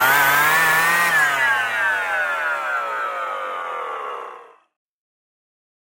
delphis FX MACHINE 3
Selfmade record sounds @ Home and edit with WaveLab6